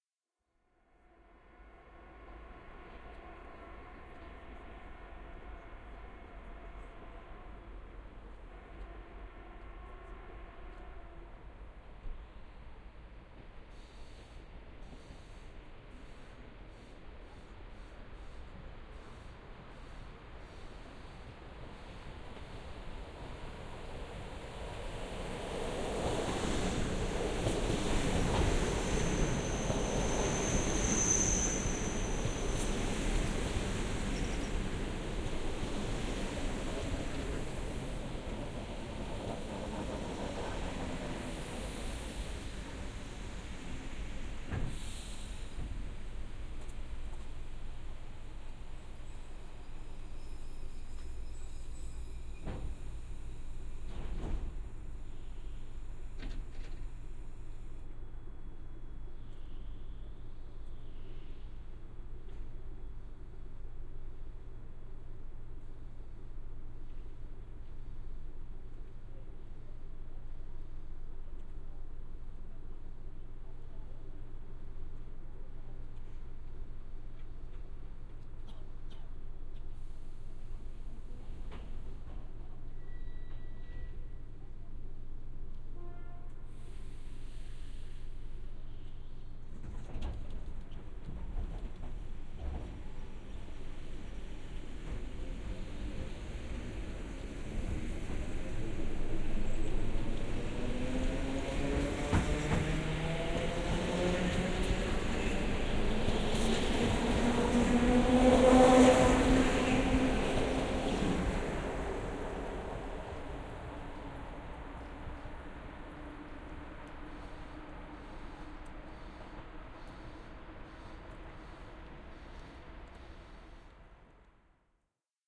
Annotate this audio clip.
Train arriving and departing, Khotkovo station around 18.30 10 Oct 2021
binaural, locomotive, Moscow, passing, railroad, rail-way, region, trains